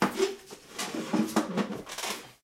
asseoir chaise5
sitting down on a wood chair which squeak
chair, furniture, sit-on-chair, sitting, sitting-down, squeaky